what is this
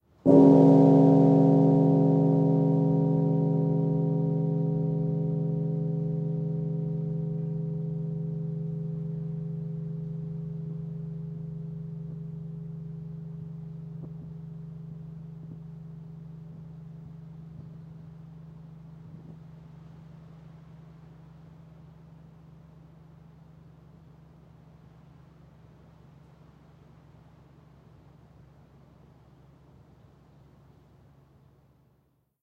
carillon low bell
The lowest (largest) carillon bell at Rockefeller Chapel, University of Chicago. The bell weighs over 38,000 pounds and is about 10 feet in diameter. The pitch is C#. Recorded from inside the bell tower. A bit of wind and street sound can be heard during the decay.
bell, bronze, carillon